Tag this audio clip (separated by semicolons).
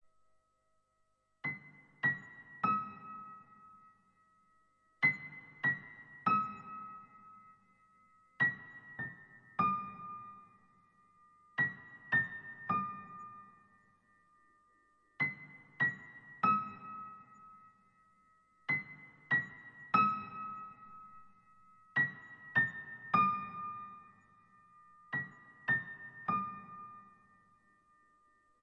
anxious; creepy; horror; piano; scary; sinister; spooky; suspense; terror; thrill